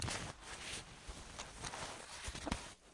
Clothing slide and crumple